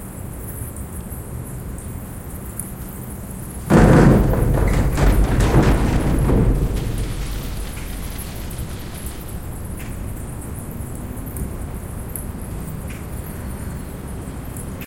Bike on Bridge 2
Another bicycle passes by on the wooden bridge underneath and behind the microphone perspective. My gains were turned up to capture the bats so this was loud enough to get hit by the limiter on the mixpre, still sounds pretty cool tho.
From a recording made underneath the 'Congress Bridge' in Austin Texas which is home to a large bat colony.
bats, wood, bicycle, field-recording, bridge